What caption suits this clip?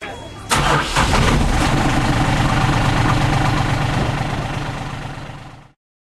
engine-start
A diesel engine starting
Engine-starting, diesel-engine, starting-an-engine